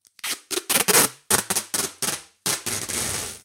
Some Duct Tape